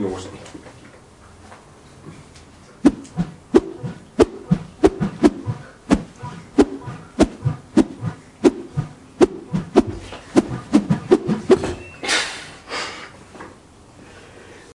Recorded with rifle mic. Swinging metal pipe